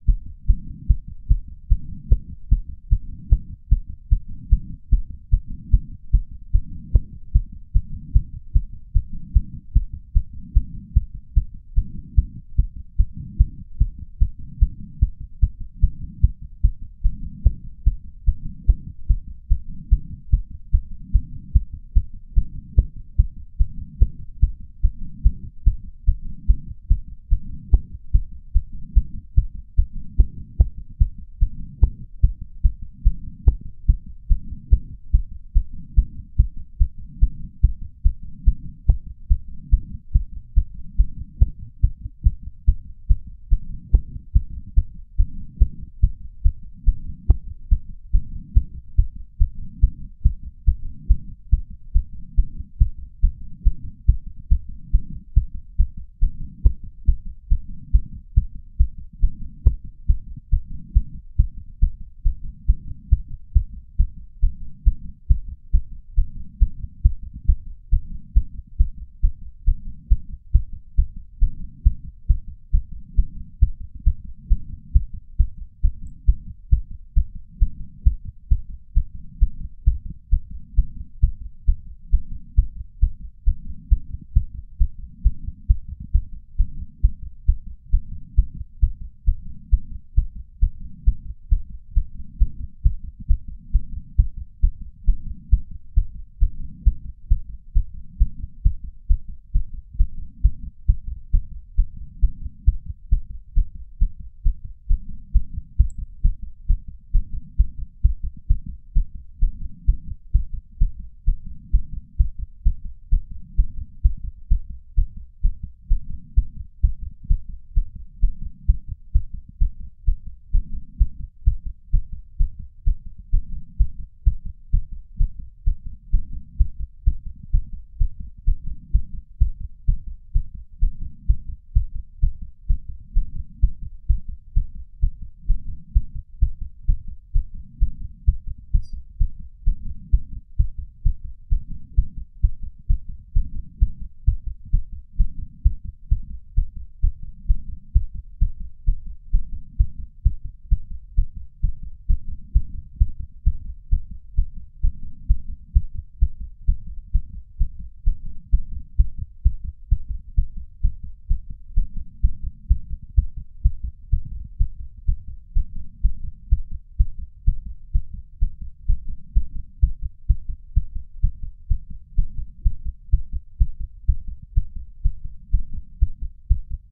Real heartbeat sound fastest
Audio recording of the racing heart of a 31 year old male, pounding away after exercise. Starts around 150 beats per minute, ends up around 130 bpm. Breath sounds are also heard.
Recorded with a GigaWare lapel mic and a small ceramic bowl. Recorded on December 29, 2018.